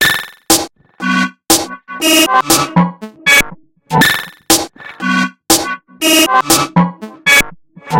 Massive Loop -33
Another weird experimental drumloop with a slight melodic touch created with Massive within Reaktor from Native Instruments. Mastered with several plugins within Wavelab.